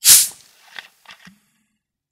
A bottle of coke being opened.